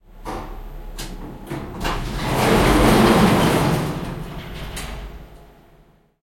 escalator door opening
recorded in elevator while opening doors
door, escalator, lift, open